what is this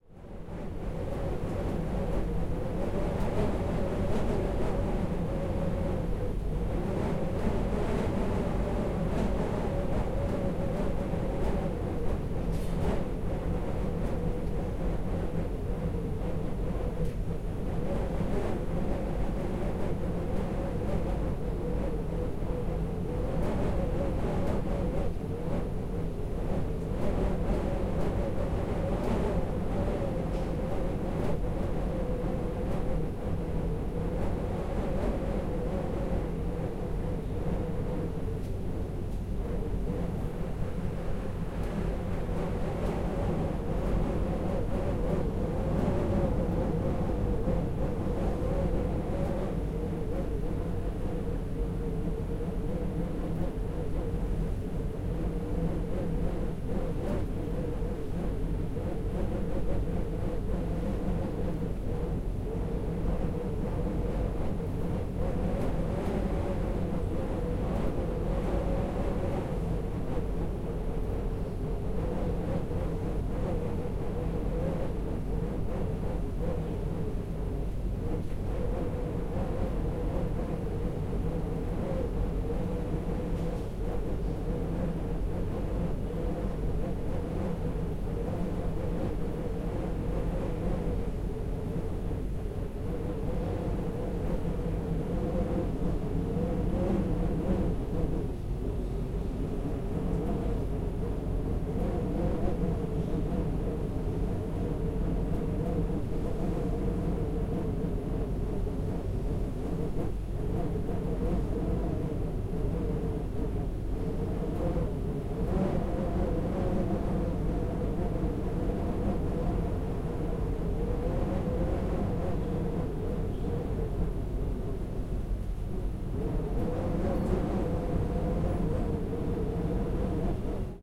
One was capture when I was travvel by the ship across Black Sea. It was a wind passing through my door. Listen to it and realized that everyone Need It! So - take it )